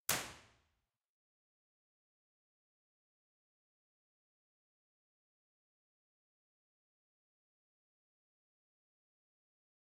ESMUC Choir Hall IR at Mid Right-Center
Impulse Response recorded at the Choir Hall from ESMUC, Barcelona at the Mid Right-Center source position. This file is part of a collection of IR captured from the same mic placement but with the source at different points of the stage. This allows simulating true stereo panning by placing instruments on the stage by convolution instead of simply level differences.
The recording is in MS Stereo, with a omnidirectional and a figure-of-eight C414 microphones.
The channel number 1 is the Side and the number 2 is the Mid.
To perform the convolution, an LR decomposition is needed:
L = channel 2 + channel 1
R = channel 2 - channel 1
esmuc, impulse-response, ir, reverb